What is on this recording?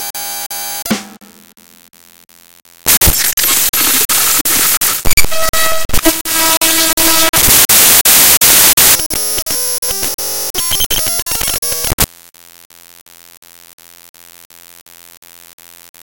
more data noise
computer, data